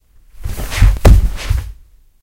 falling on TATAMI
accident, beat, fall, falling, hit, Japan, Japanese, tatami